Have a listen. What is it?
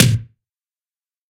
⇢ GREAT Tom Real Top
Real Tom. Processed in Lmms by applying effects.